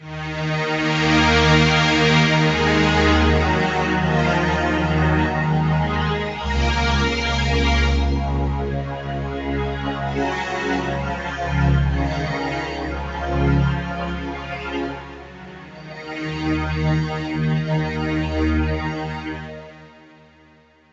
melodic sound for trance music